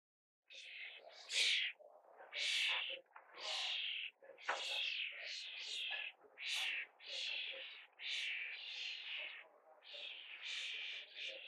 Baby Starlings being fed
Starling feeding young. Taken in a garden in Yorkshire. Camera used a Nikon Coolpix p520.
birds, Baby, garden, feeding, starlings